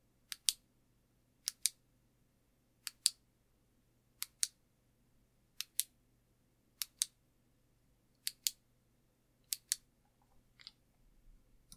Flashlight clicking sound
flashlight, click, sound, button, clicking, clicks